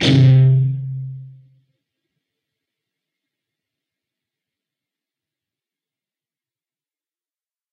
Dist Chr Cmin up pm
A (5th) string 3rd fret, D (4th) string 1st fret, and G (3rd) string, open. Up strum. Palm mute.
distortion, rhythm, guitar, guitar-chords, distorted, rhythm-guitar, chords, distorted-guitar